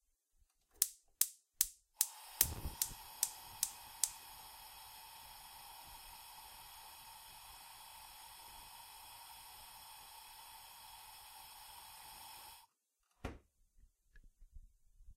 kitchen hob
UPF-CS13, campus-upf, cooking, hob, kitchen, cook